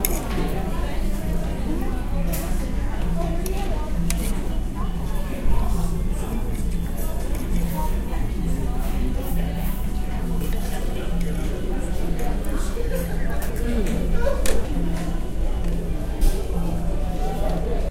The ambiance of sitting at a table inside a restaurant. Emphasizes the person in front of me eating his/her food. Somewhat loop friendly.
dinner, general, chatter, ambiance, restaurant, lunch, music, breakfast, eat, food, ate, eating, dining